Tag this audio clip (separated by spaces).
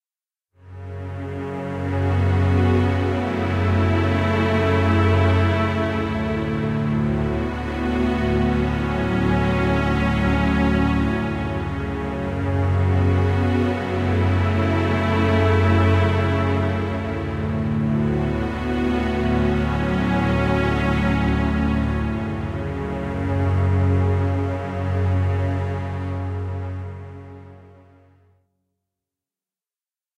ambience ambient atmosphere background background-sound cinematic dark deep drama dramatic drone film hollywood horror mood movie music pad scary soundscape spooky story strings suspense thrill thriller trailer